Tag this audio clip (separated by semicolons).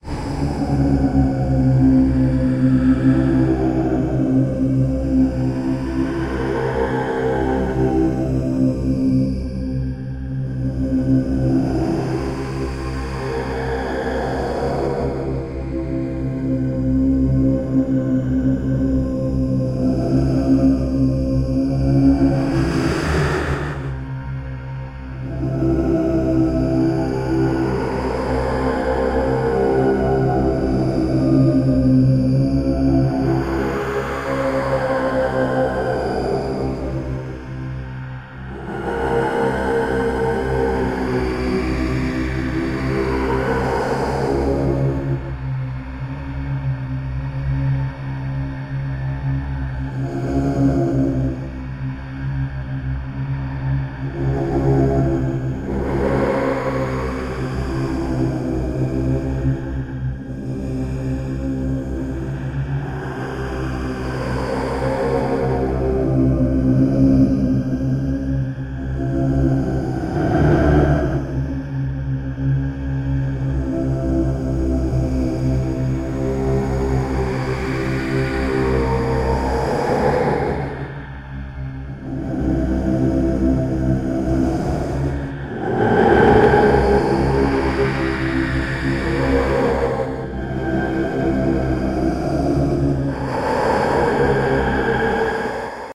drone; monk; sing; synthesized; throat; tibet; vocal